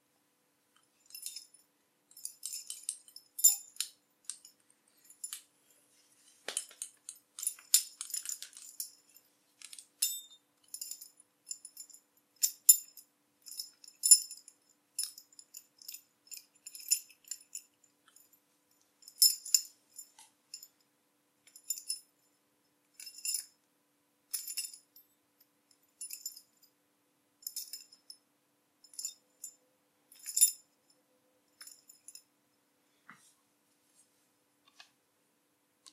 Dog Collar Jingling
Jingling a dog collar with a tag on it. Recorded on Razer Kraken Kitty and cleaned up in Audacity.
collar, dog, jingle, jingling, metal, tag